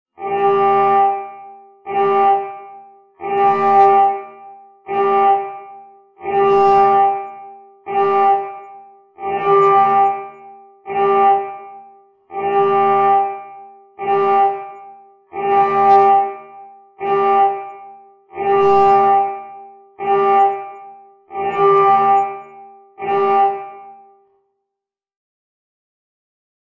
Futuristic Alarm5
Have you ever gone looking for that perfect siren? That one that will certainly spell doom for the characters in your movie. Only to find nothing to your taste? Well have no fear! Because the Doomsday Sirens Pack is here!
Created using several alarm clock sound clips from the Mixcraft 5 library. Created on 9/10/16 Mixcraft 5 was also used to slow the sounds and alter the pitch and reverb.